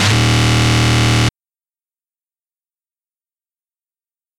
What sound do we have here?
This sound was made by looping a snare and speeding it up to make a pitch, bc pitch is allegedly just fast rhythm.
I made this on July 18 2019 and then forgot about it, so it might not have been a snare that I looped. But it was either a garageband default sound or something I recorded.
Please show me anything you make w/ it!
buzz, bass, hard, snare